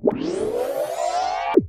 Another Homemade sound effect using Audacity.
EFFECT, FAST, RACER, SOUND, SPEED, X, ZOOM